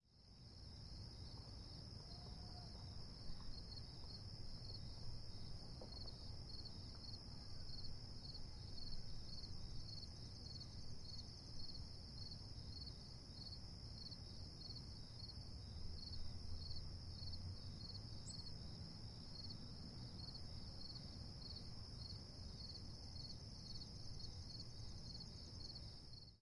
LARGE FIELD LATE NIGHT DISTANT TOWN FRONT ST 01
Summer night in a field in suburbs with crickets 11pm front pair of Samson H2 surround mode - (two stereo pairs - front and back) low level distant sound of town and highway
summer, crickets, night